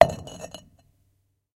stone on stone impact4
stone falls / beaten on stone
impact, concrete, strike, stone